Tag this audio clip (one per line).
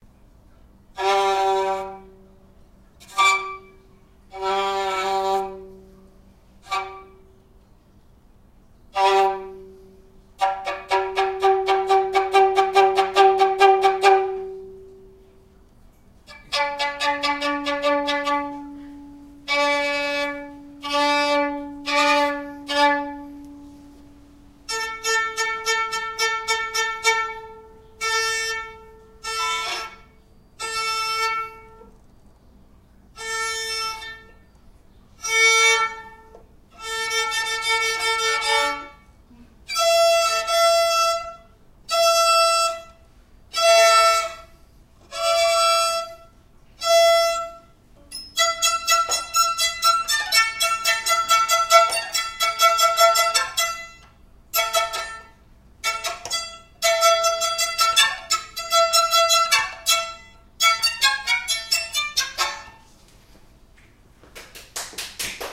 phrases
violin